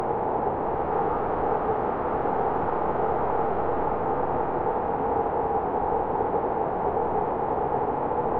Cold Wind Loop
A loop-able sample (actually loopable) sound of a cold sounding wind made from layering two or three white noise recordings/takes. Inspired from a few games which feature "low-fi" loops that act as background noise.
windy, gusts, ambiance, gale, loopable, weather, winter, synth, cold, isolated